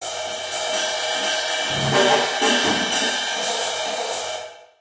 Stereo Drums Effect Room
Some of my drum recordings stereo mixed. test
cymbals, roomy, stereo